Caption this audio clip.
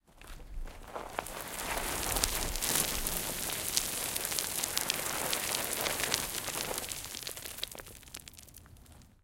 Pushing some gravel off a small hill
Pushing some gravel from the top of a small dirtheap.
Nice particles and distinctive stones rolling.